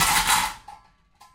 pots and pans banging around in a kitchen
recorded on 10 September 2009 using a Zoom H4 recorder